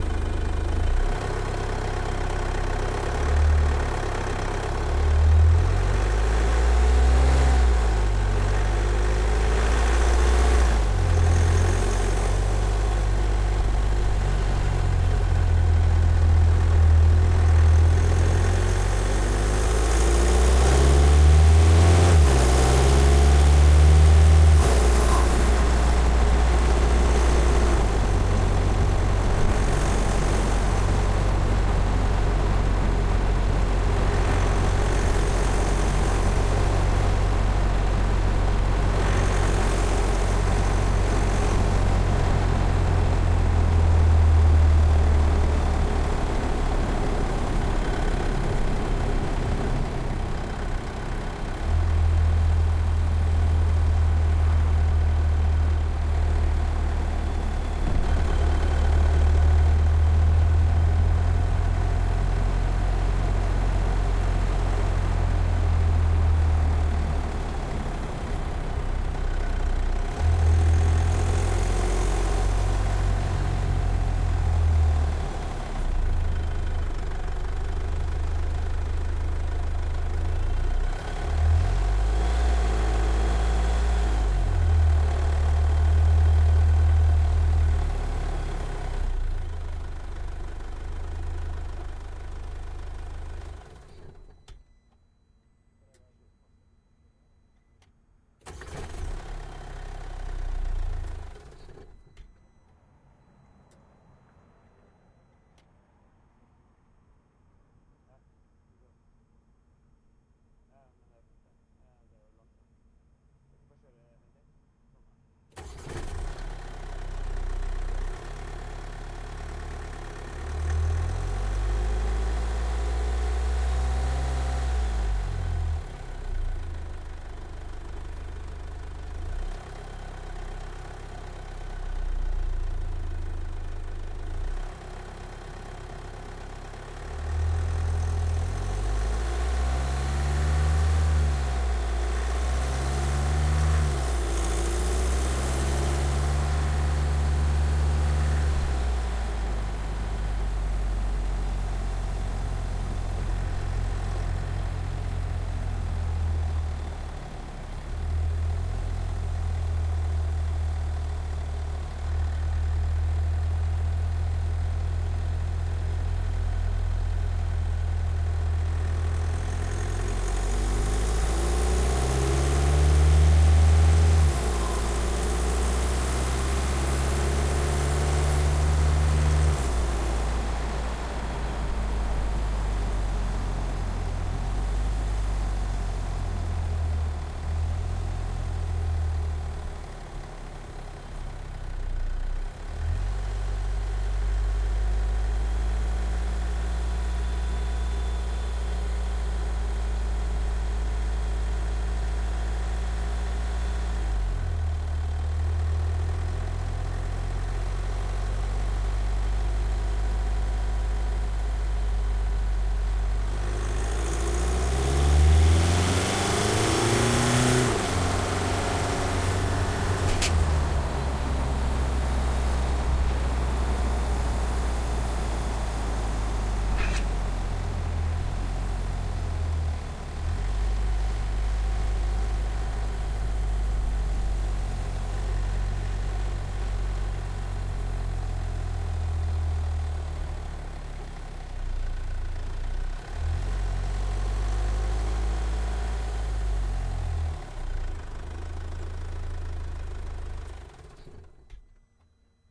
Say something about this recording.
MERCEDES long drive with speed
This recording i did to a short film, it is a miks of several microphones in motor biheind the car and near the wheel. I used dpa dpa lavalier mkh 406 schoeps cmc 5 and mkh 60.